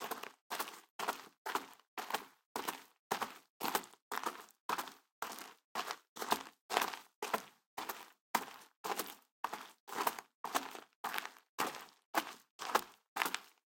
Footsteps Gravel+Dirt 3
Boots, Dirt, Foley, Footstep, Footsteps, Grass, Ground, Leather, Microphone, NTG4, Paper, Path, Pathway, Rode, Rubber, Run, Running, Shoes, Stroll, Strolling, Studio, Styrofoam, Tape, Walk, Walking, effect, sound